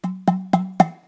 Pong Pop
Per Wikipedia: The udu is a plosive aerophone (in this case implosive) and an idiophone of the Igbo of Nigeria.[1] In the Igbo language, ùdù means 'vessel'. Actually being a water jug with an additional hole, it was played by Igbo women for ceremonial uses.[2] Usually the udu is made of clay. The instrument is played by hand. The player produces a bass sound by quickly hitting the big hole.[3] There are many ways that the pitches can be changed, depending on how the hand above the small upper hole is positioned. Furthermore, the whole corpus can be played by fingers. Today it is widely used by percussionists in different music styles.
Udu, Afircan, Drum, African